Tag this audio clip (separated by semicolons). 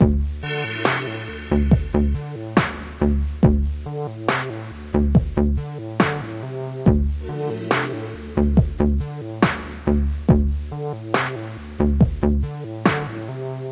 game; gamedev; gamedeveloping; games; gaming; indiedev; indiegamedev; loop; music; music-loop; Philosophical; Puzzle; sfx; Thoughtful; video-game; videogame; videogames